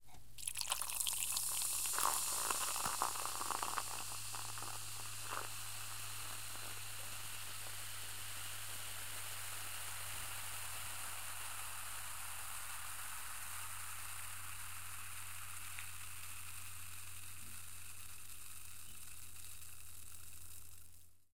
soda - pour
Pouring a can of soda into a glass.
aluminum-can, pour, pop-can, food, poured, soda, aluminum, beverage, soda-pour, pouring, pop, can, soda-can, drink, pouring-soda